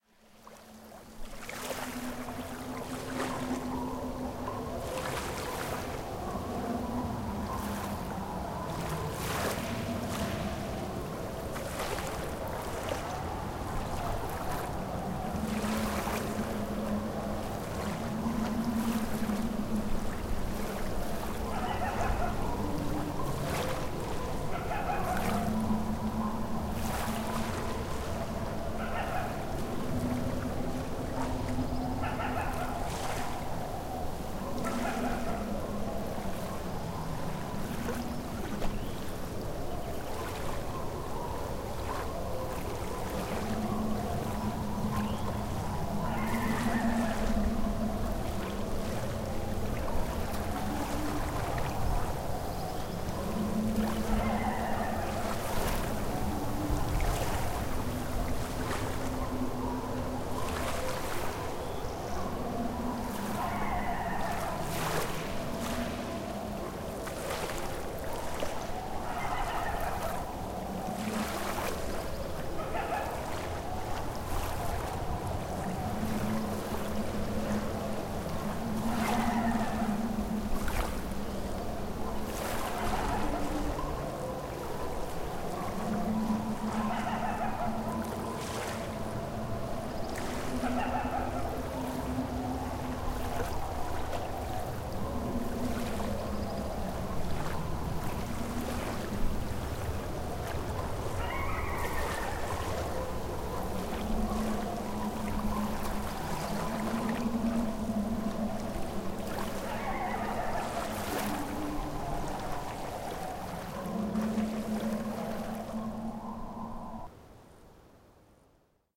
Mixed sounds to create a spooky waterside effect, evoking a scene of a haunted campsite by a river.
creepy, haunted, horror, lapping, scary, water, wilderness